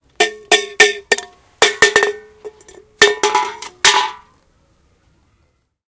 mySound WBB Tristane
Sounds from objects that are beloved to the participant pupils at the Wijze Boom school, Ghent
The source of the sounds has to be guessed, enjoy.